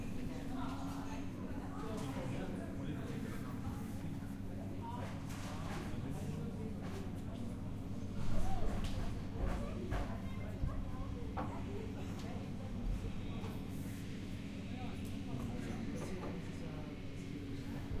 ZKM Karlsruhe Indoor Bistro